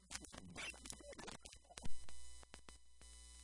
vtech circuit bend002
Produce by overdriving, short circuiting, bending and just messing up a v-tech speak and spell typed unit. Very fun easy to mangle with some really interesting results.
micro, digital, speak-and-spell, music, noise, broken-toy, circuit-bending